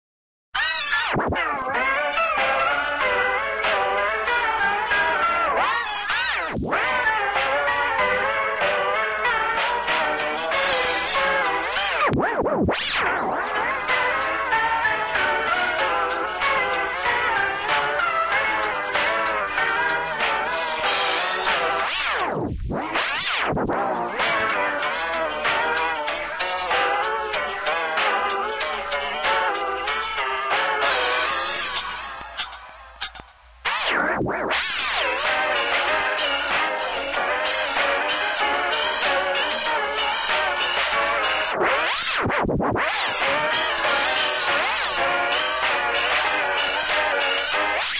A little piece sounding as an old destroyed wobbly record created in Reason.